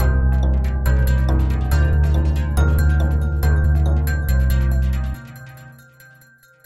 Hmasteraz GL-01 GangTribe D
Same loop without bass or percs. Made in FLStudio 6.